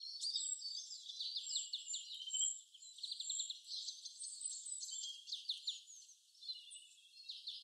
sound of a winter forest in Scotland, birds tress nature sounds
birds, ambient, nature, forest, birdsong, background, ambience, bird, field-recording